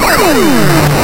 Weird glitchy noise
A glitchy noise made with Caustic. Made by combining 2 sounds in the CSFXR, applying effects to them and reversing.